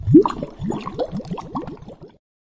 in water
bubbles, bubbling, underwater, water